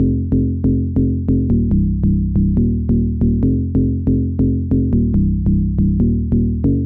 Bass Barkley - 4 bar - 140 BPM (no swing)
Acid-sized sample made with FruityLoops. I can't find out why I didn't make a track with this bass, I remember that I begun one... Anyway, hope you enjoy it!
bass, bigbeat, breakbeat, dance, electro, electronic, fruityloops, loop, music, sample, synth, techno, trance